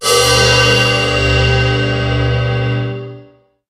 Space Hats
Loud and heavy HH hi hat
Cymbal, Heavy, Processed